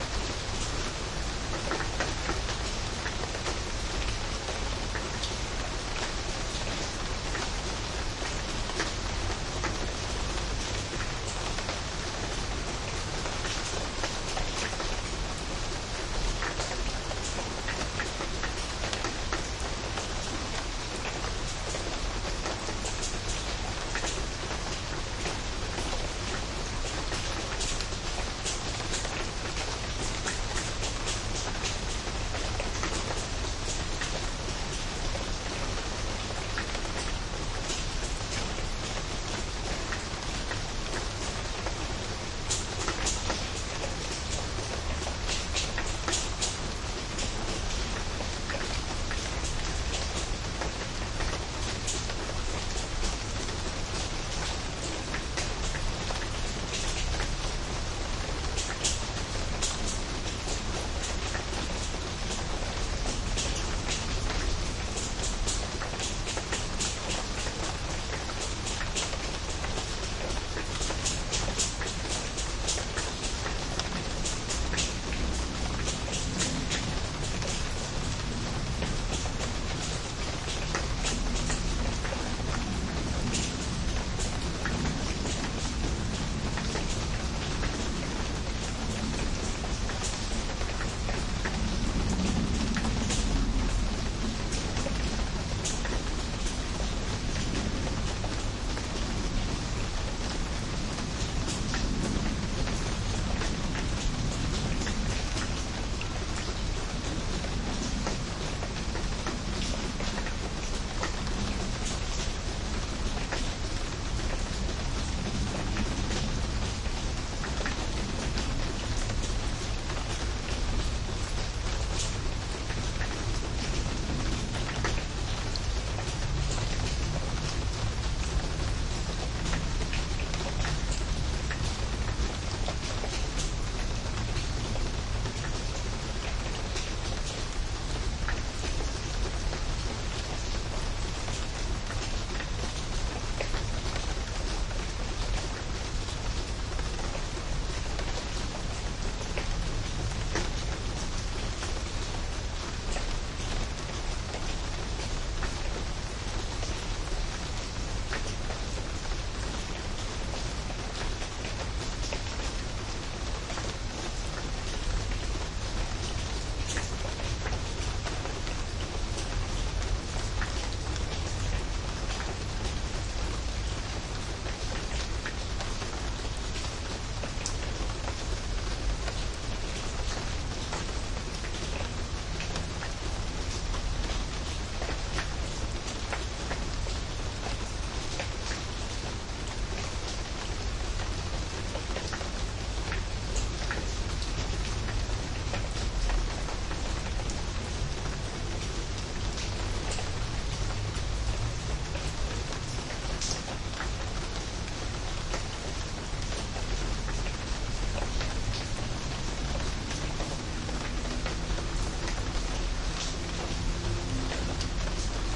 Rain in Bangkok - Windows Open 2
raining, rain, raindrops, weather